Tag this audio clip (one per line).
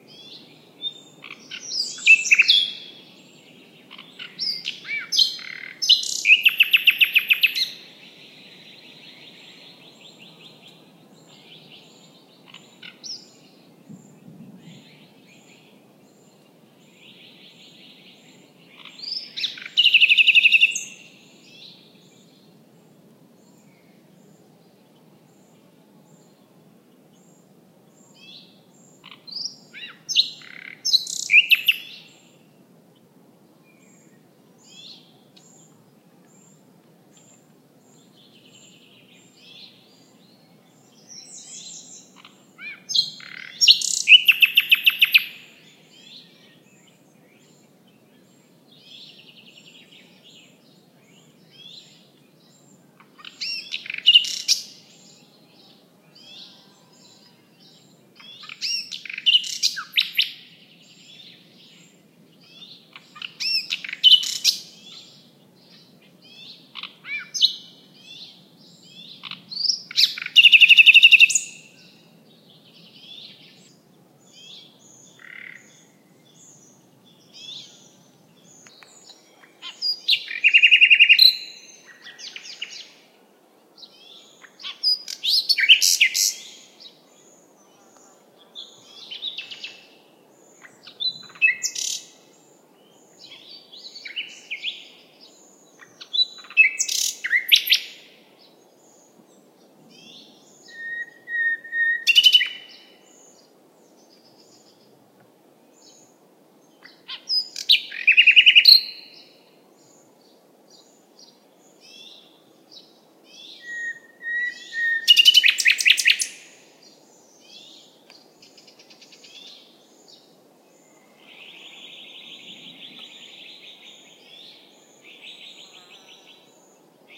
birds
field-recording
spring
donana
forest
nightingale